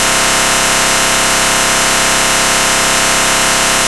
Doom Bug 1
Harmonically-rich buzzing sound.
glitch, noisy